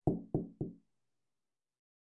Knock On Door 1 2
Knock, Recording, Surface, Desk, Sound, Door, Wooden, Real, Foley, Hard, Wood, Scratch, Design, Light, Hit, Scrape